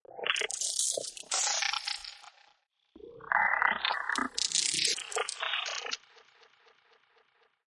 Ice - Effects - Sequences 01 - Breaking, Cracking
A collection of free sounds from the sound library "Designed Ice".
cracking, freeze, game, Design, film, atmosphere, special-effects, sfx, breaking, texture, snow, Foley, sci-fi, granular, ambience, drone, ice, winter, cold, frozen, glitch, sound-effect, creaking, sound-effects, cinematic, crack, futuristic, creative